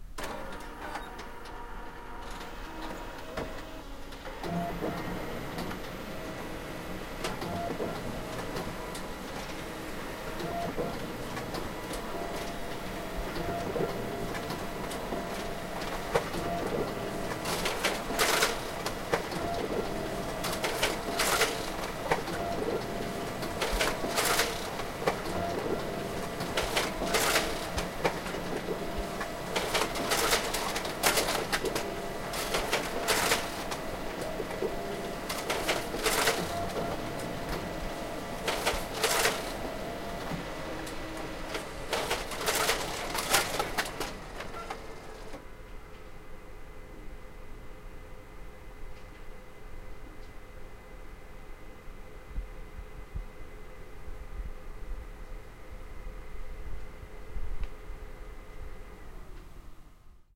Printer, Distant, A
A raw recording of a MultiXPress X7400GX printer. The recorder was held about 2 metres away from the printer.
An example of how you might credit is by putting this in the description/credits:
The sound was recorded using a "H1 Zoom V2 recorder" on 22nd September 2016.